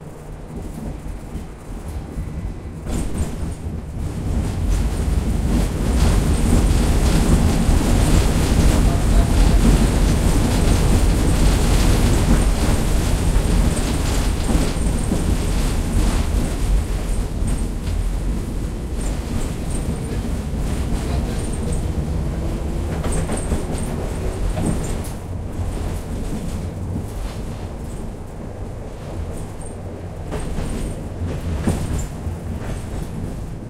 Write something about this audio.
tram crosses the street (new surface car)
New surface car crosses the street. A lot of low frequency sound.
Recorded 2012-09-25 09:30 pm.
AB-stereo
tram, urban, Siberia, West-Siberia, clang, rattling, passenger